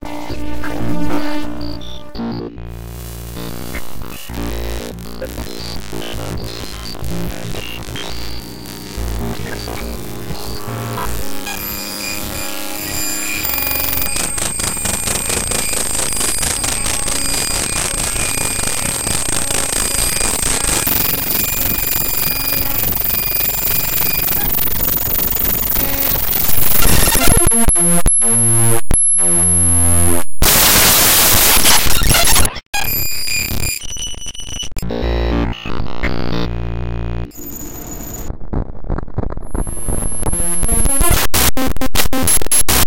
Hi-Tech Computer Glitch 1
If a computer is blowing up by itself or a robot has got a malfunction, then this sound can help you to create the right atmosphere.
It comes with different parts in the mix that can help editors and sound designers to obtain the right tone which they were looking for.
Enjoy
sci, fx, lo-fi, data-destruction, distortion, glitch, electric, sound-design, fi, digital, future, digital-distortion, Computer, Hi-Tech, futuristic, sfx, design, robot, sci-fi, freaky, sound